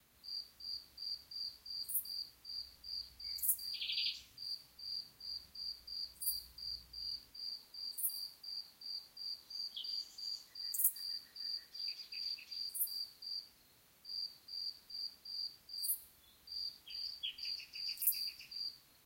Ambience Night Loop Stereo
Ambiance at night. We can hear crickets and some birds. Loop (0:19sc).
Gears: Tascam DR-05
clean, ambiance, field, tascam, birds, relaxing, calm, crickets, loop, recording, quiet, night, background-sound